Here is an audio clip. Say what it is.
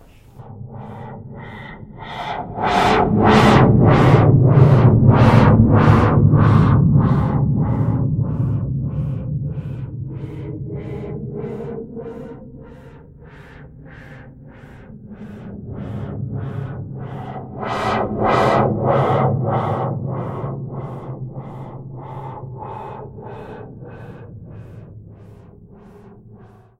sci-fi 01
Original track has been recorded by Sony IRC Recorder and it has been edited in Audacity by this effects: Flanger.